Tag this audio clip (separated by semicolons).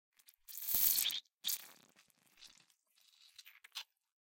blood; flesh; gore; Squelch; Squelching; tear